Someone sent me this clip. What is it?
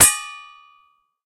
Percasserole rez C 3 f
household, percussion